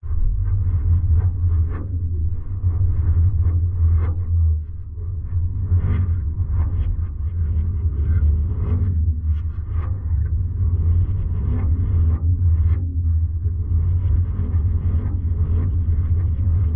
Creepy Bassy Atmo (loop)
Creepy lo frequency drone \ atmo that can be looped
ambient, atmo, background, deep-space, drone, experimental, soundscape, space, spaceship